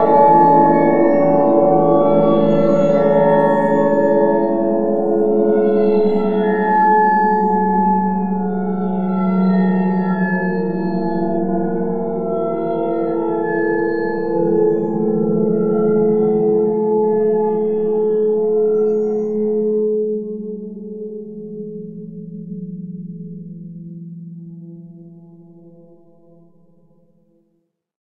A friend was travelling, stayed over, and brought a battered clarinet (they play saxophone usually)- I sampled, separated a few overtones, and put them back together.